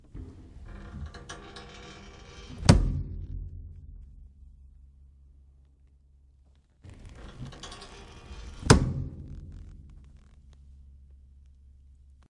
Recliner couch closes up, with springs reverberation. Two takes, the first one is cleaner but the second has a bit more character.
Recorded with Røde NTG-3 into a Canon C200.